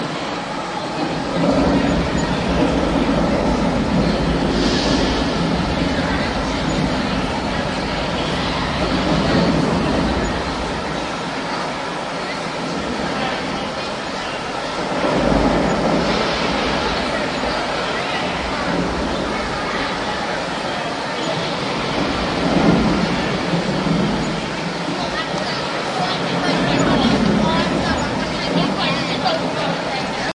washington naturalhistory fakestorm
A fake storm in the room with all the stuffed animals recorded with DS-40 and edited in Wavosaur.
field-recording, natural-history-museum, road-trip, summer, travel, vacation, washington-dc